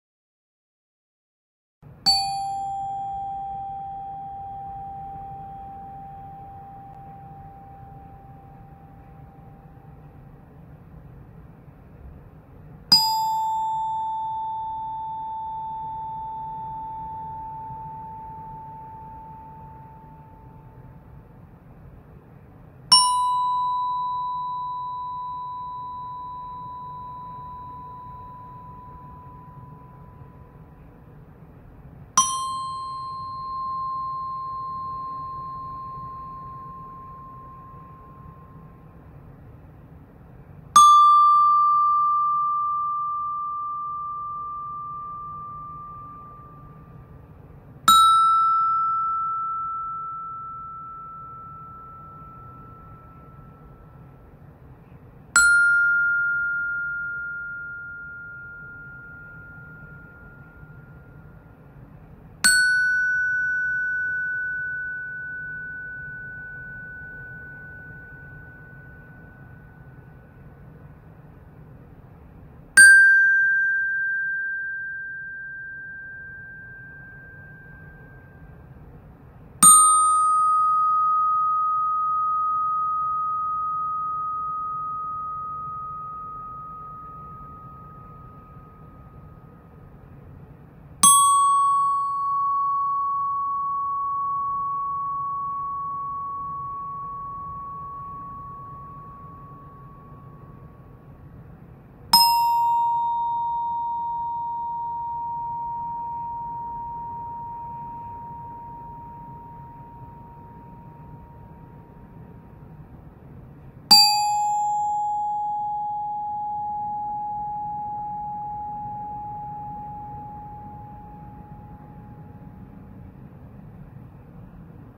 Bells Pitch Sampler
A series of single notes played and allowed to fully ring out on a set of orchestra bells.